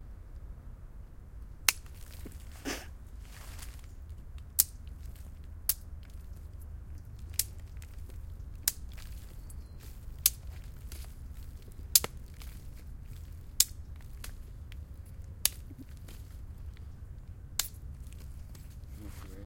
Breaking little pieces of wood
Breaking little wood pieces at Porto's Parque da Cidade.
cam, ulp, ulp-cam, wood